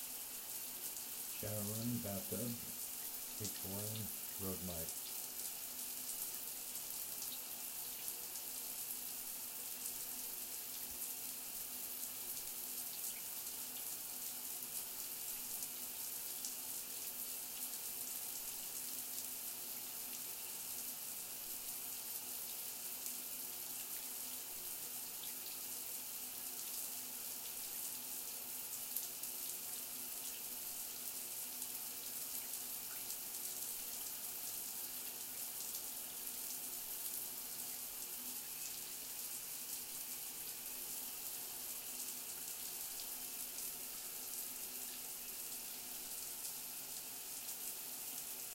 shower running 2
shower running h4n& rode mic
bath, bathroom, faucet, running, shower, tub, water